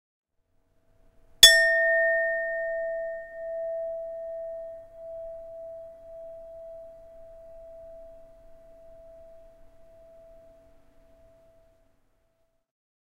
DS.Clink.0
Hit that lid!!
chime, clink, hit, metal, reverb, sound